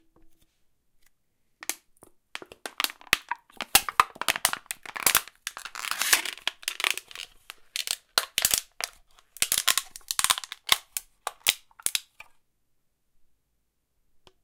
beer can destroy
beer can crumpling. Recorded with zoom h4n internal mic
beer, can, destroy, garbage, rubbish